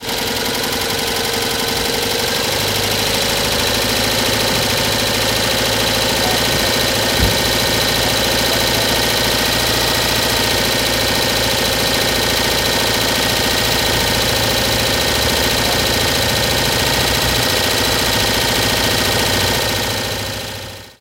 Noise of a venerable 2CV engine idling at high speed. Recorded with my smartphone in downtown Seville (S Spain)